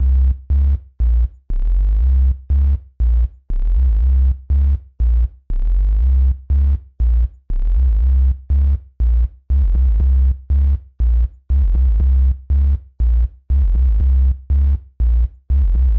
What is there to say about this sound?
These loops are all with scorpiofunker bass synthesiser and they work well together. They are each 8 bars in length, 120bpm. Some sound a bit retro, almost like a game and some are fat and dirty!
These loops are used in another pack called "thepact" accompanied by a piano, but i thought it would be more useful to people if they wanted the bass only.